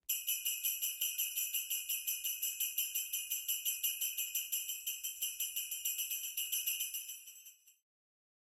Sleigh Bells fast
Fast sleigh bells